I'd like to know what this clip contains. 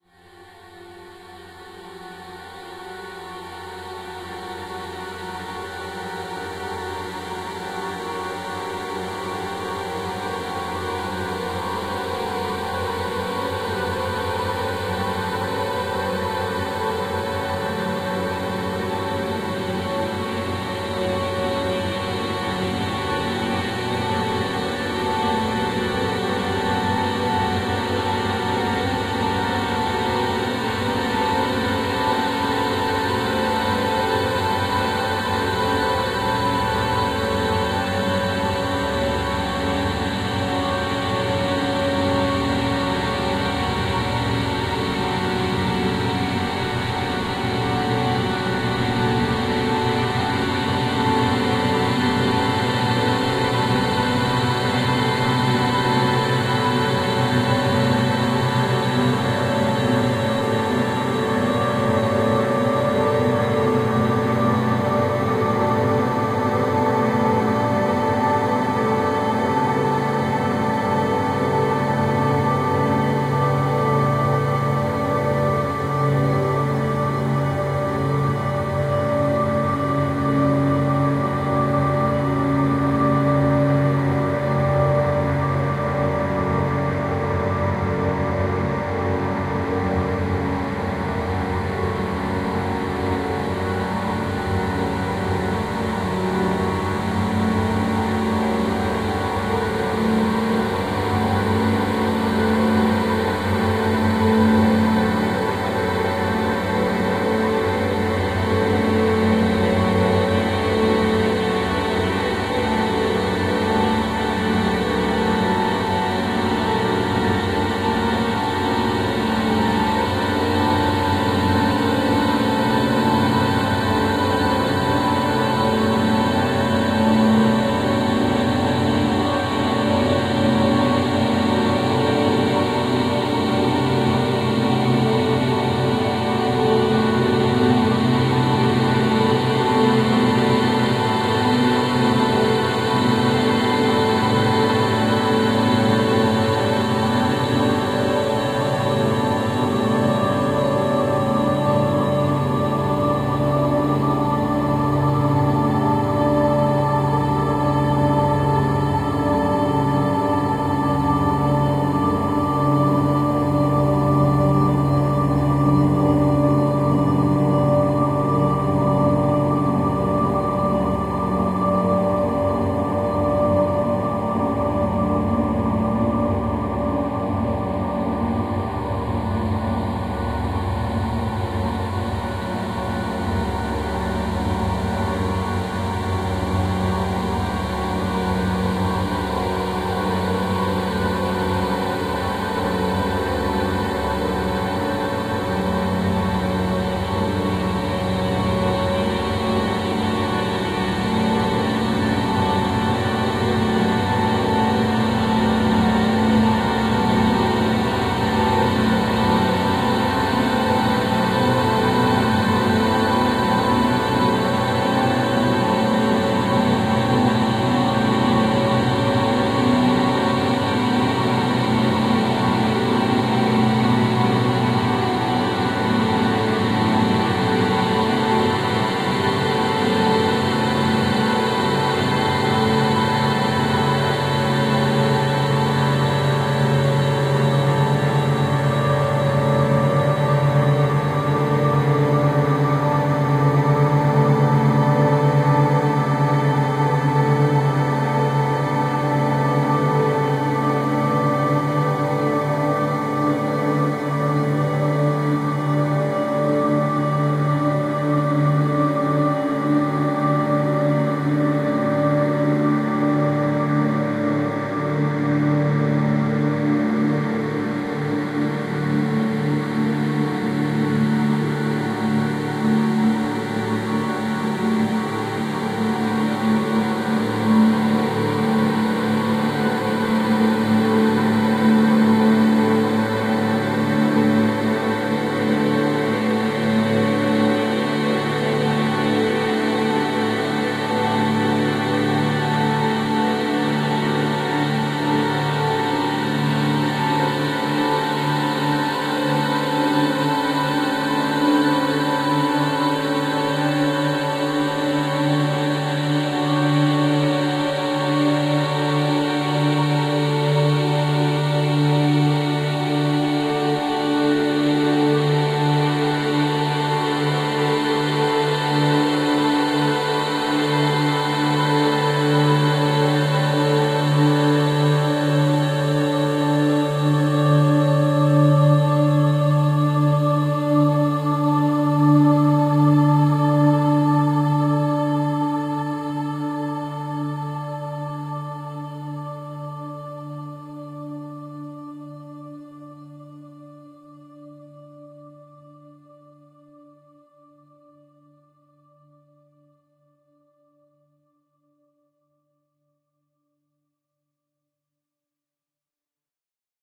More blurred atmospheric sounds from a female vocal samples.
ambience, atmospheric, blurred, emotion, ethereal, floating, synthetic-atmospheres